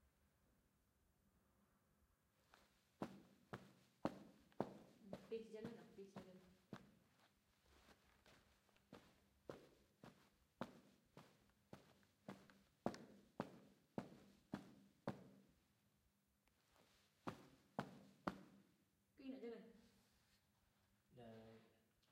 Footsteps - Carpet (Dirt) 2
footsteps carpet dirt sounds environment natural surrounding field-recording